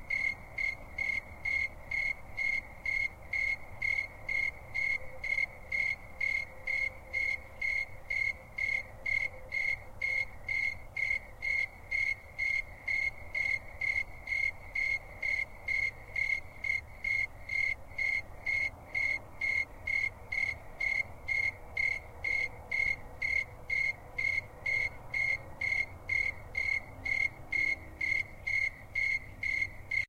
Some distant traffic noise. 30 seconds.